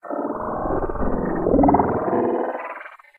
sound-design created from processing a field-recording of water recorded here in Halifax; processed with Native Instruments Reaktor and Adobe Audition
1-bar, sound-design, water, processed, loop, field-recording